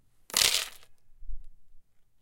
Small Metal Objects Falling
chain, dropping, falling, impact, marbles, metal, toys